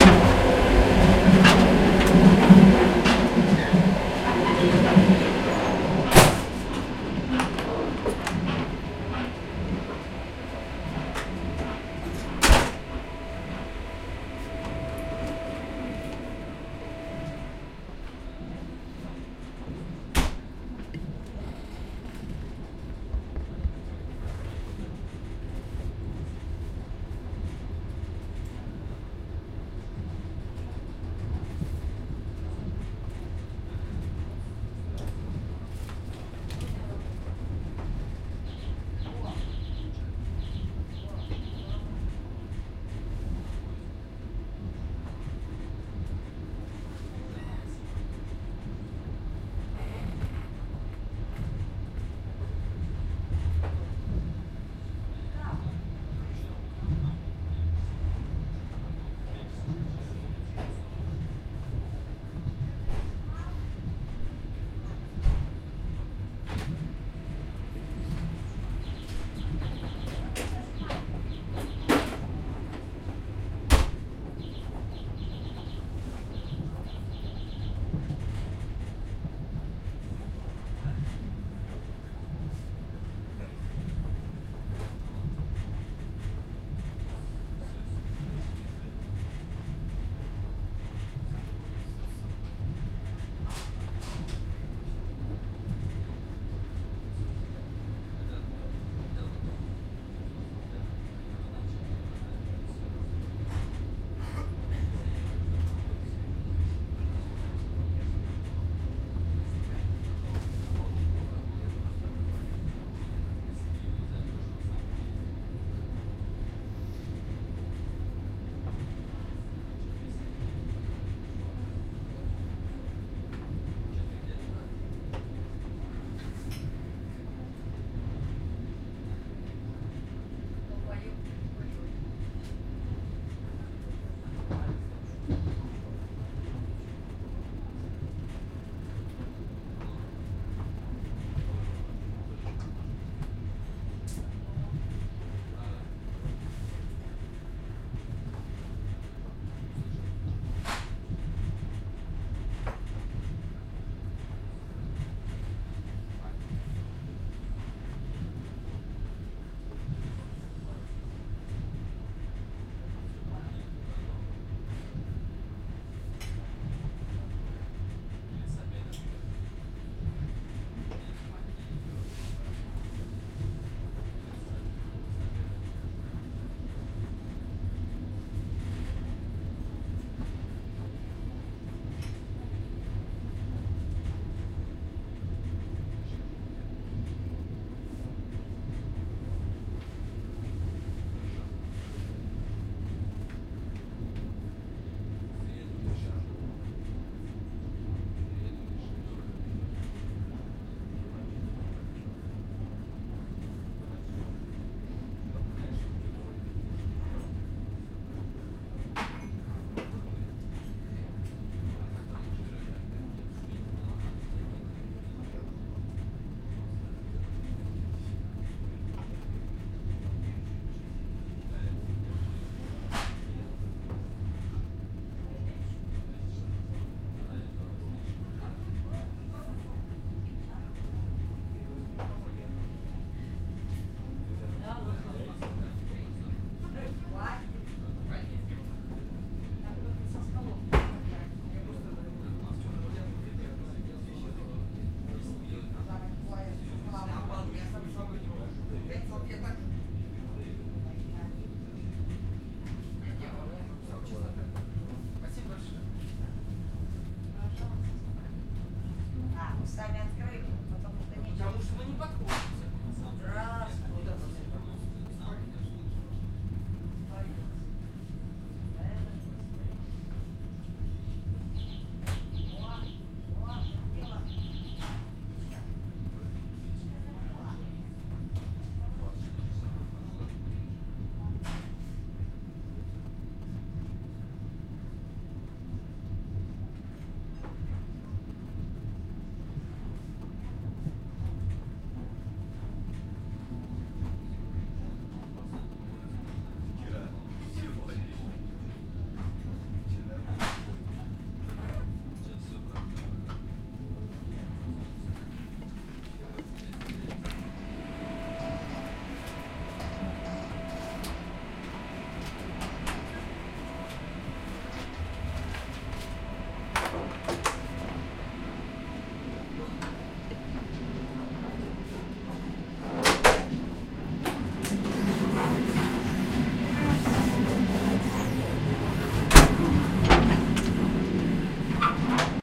Walking through a moving train passenger wagon. Yurty - Krasnoyarsk
Moving through train corridors. Ambient in the restaurant wagon, people passing, cell phone noises from other cabins. Very distant chatter. Recorded with Tascam DR-40.
corridor, train, catter, wagon, mobile-phone, field-recording, trans-siberian